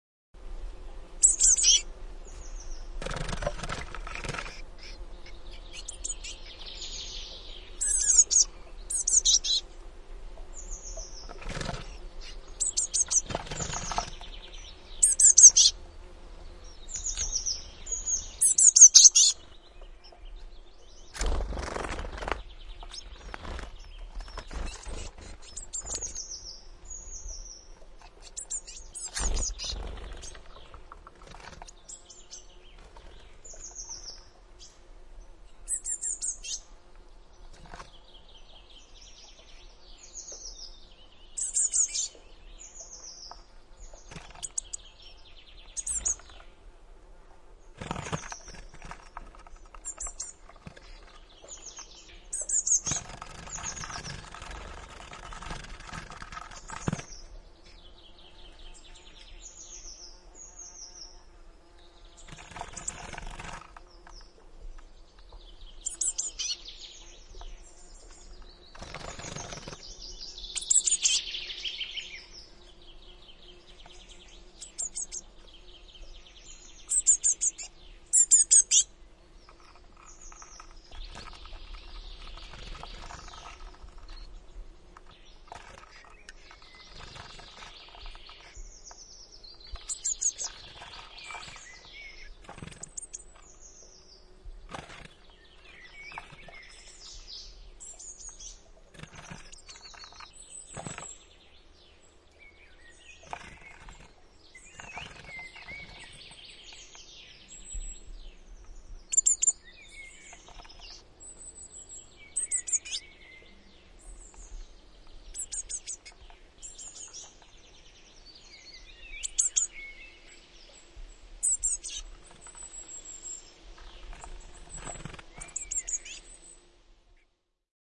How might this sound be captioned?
Sinitiainen, pesä, pönttö / Blue tit, nestlings leaving the nesting box, birdhouse, wings, bird mother calling, some other birds in the bg (Parus caeruleus)
Poikaset lähdössä pöntöstä. Siipien pyrähdyksiä, emolintu ääntelee, välillä poikasten vaimeaa ääntelyä. Taustalla muita lintuja. (Parus caeruleus).
Paikka/Place: Suomi / Finland / Lohja, Retlahti
Aika/Date: 15.07.1996